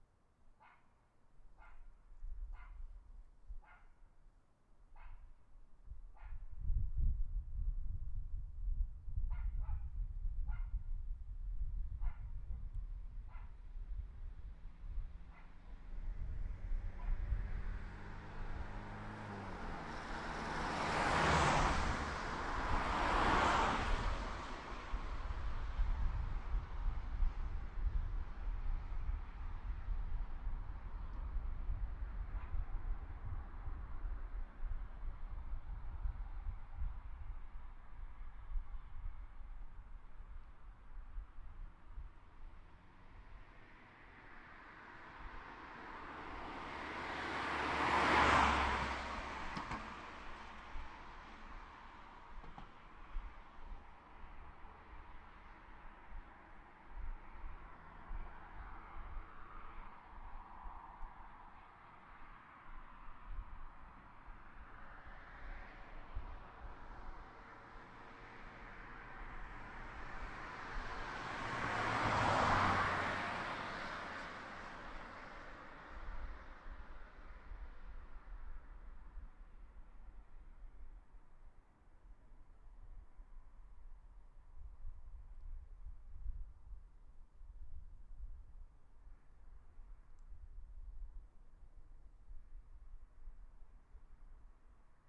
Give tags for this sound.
bridge; cars; highway

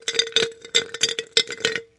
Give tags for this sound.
clink; ice

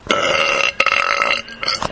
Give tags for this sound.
flatulence; weird; nascar; gas; race; vapors; ship; computer; explosion; poot; snore; aliens; car; space; fart; frog; flatulation; laser; beat

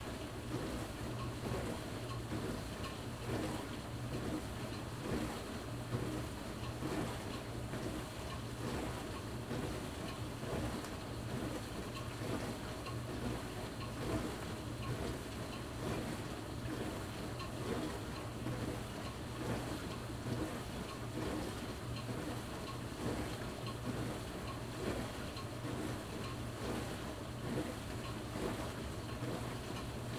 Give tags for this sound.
Thirty-seconds; Washing

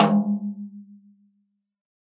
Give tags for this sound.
1-shot; drum; multisample; snare; velocity

